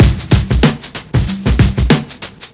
Trickyhop abcd
I think this is the first breakbeat i ever made. processed with a k2000
drumloop, lo-fi, beat, break, lofi, breakbeat, loop